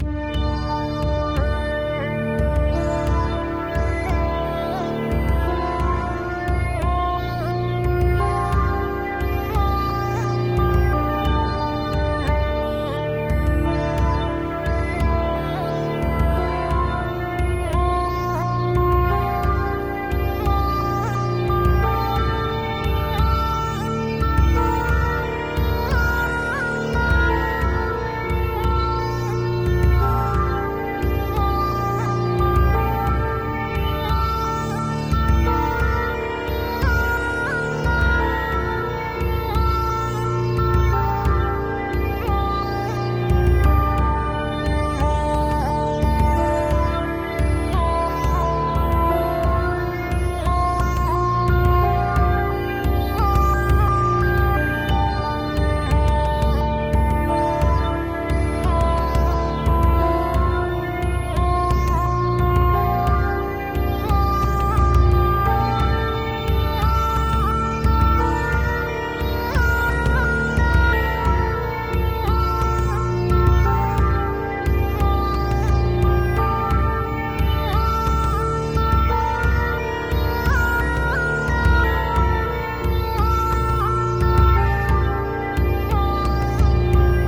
Loopable. Composed, mixed and mastered overnight. Good for adventure games etc.
For "derivatives" (in which we've used other people's sounds) find the links to check the original author's license.